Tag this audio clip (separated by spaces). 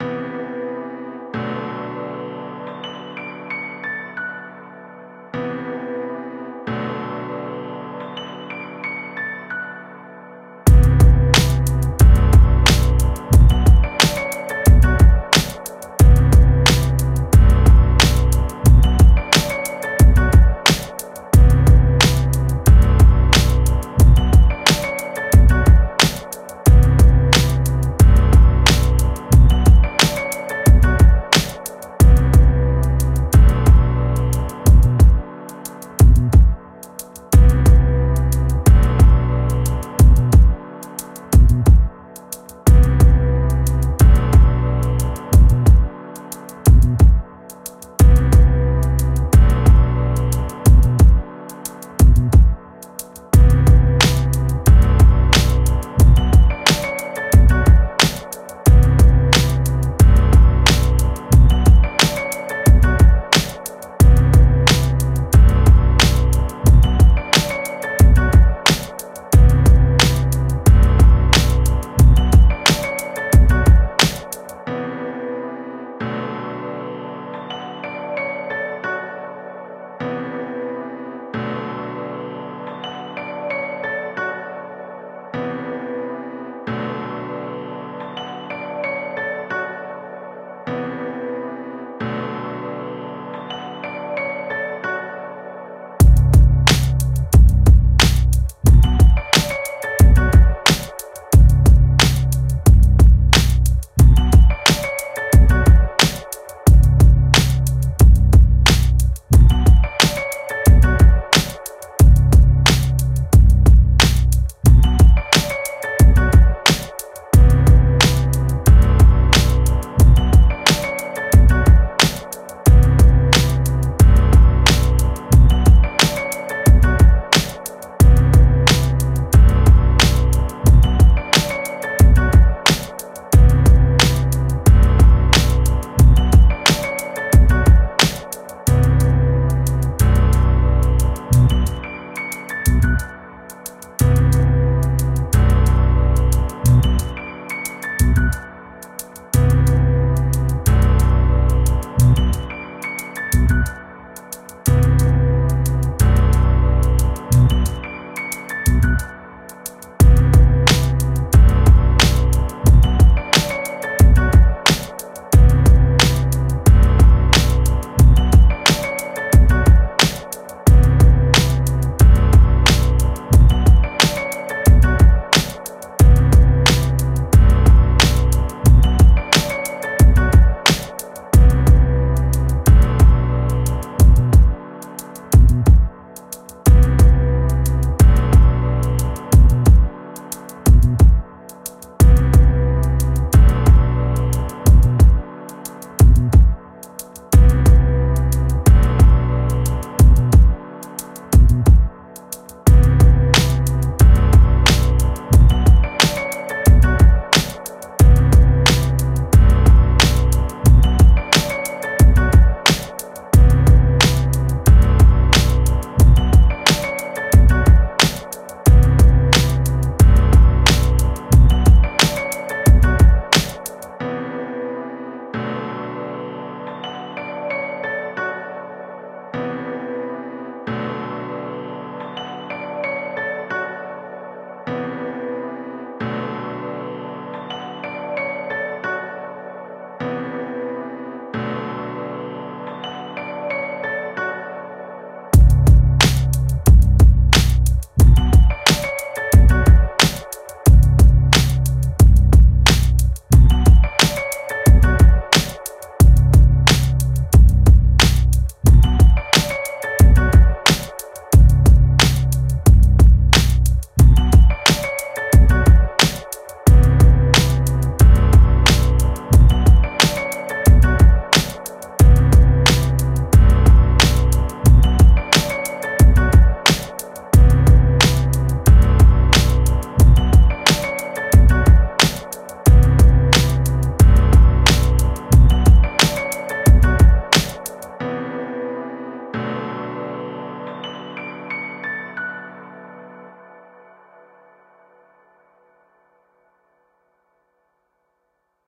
90 backround bass beat bpm drum free loop loops music percs piano podcast